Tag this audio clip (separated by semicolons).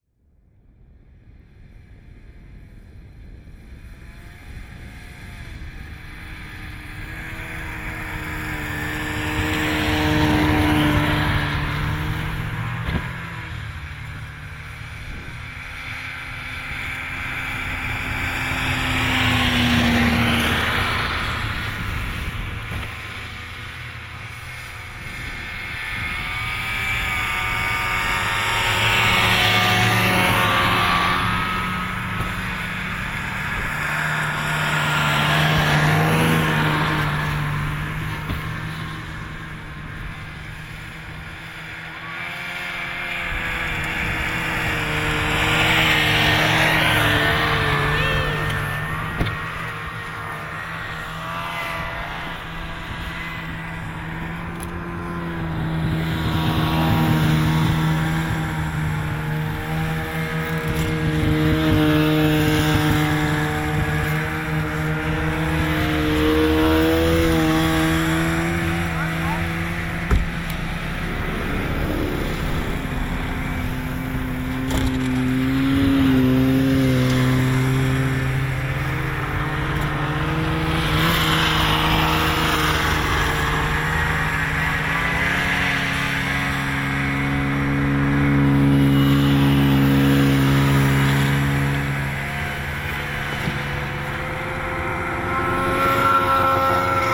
by; group; pass; snowmobiles